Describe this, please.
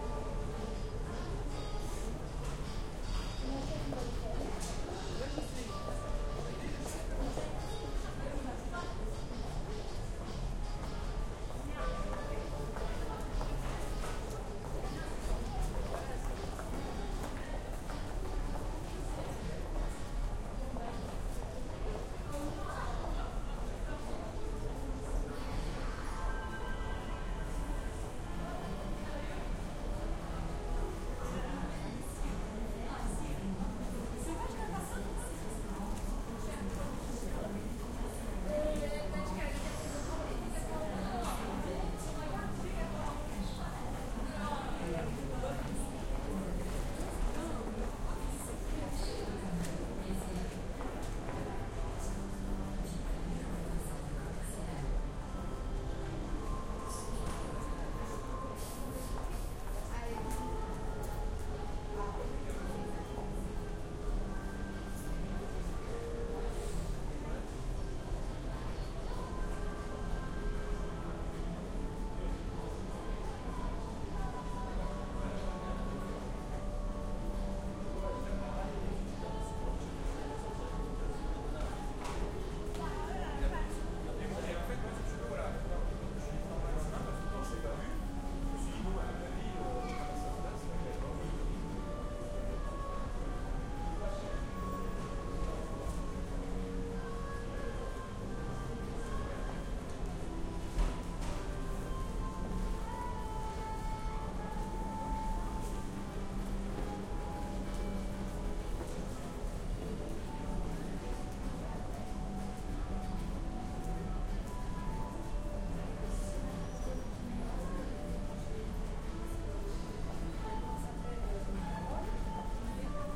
Part of the Dallas Toulon SoundScape exchange project.
Ambience recorded at the Mayol shopping Mall in Toulon France.
Criteria evaluated on 1-10 Scale
Density: 6
Busyness: 4
Order: 4
Polyphony: 3
ambience, footsteps, looping, people, soundscape, voices